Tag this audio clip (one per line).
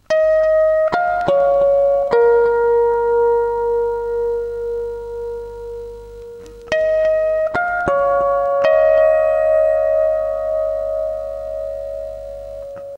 natural-harmonics
musical-instruments